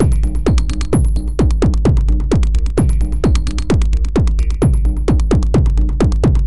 made with reaktor ensemble cyclane.
after i recorded it in reaktor i sampled (cut and paste) it in soundforge.
it is not a straight 18/4 loop.
placed region for loop and a lot of markers in it.
have fun with it!
no fx were used except in the ensemble.
it took a lot of worktime to make it sounding like it is now,because i wanted some decent dynamics in it.
also in my opinions its good when a loop is straight so that someone (maybe u) can work with it.
hope u like it!
greetings from berlin city!
bass, beat, drumloop, drums, electro, loop, reaktor, synth, synthesizer, tekno, trance